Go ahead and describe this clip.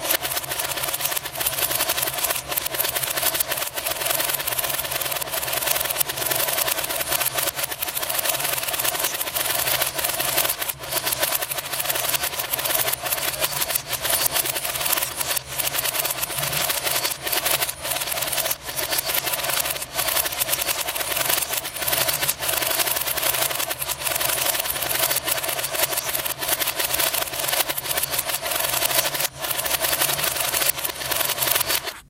In Man of La Mancha a moving stairway descends to the stage. I made a loop of chain hung over a catwalk railing, and running over a peace of conduit. I recorded the sound as a stage hand ran the chain over the rail. Chains Reversed is the reversed version. I played both versions at the same time and stopped it with the Stairway down sound.